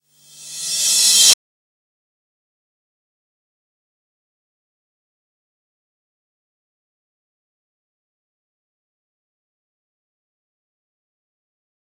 Reverse Cymbals
Digital Zero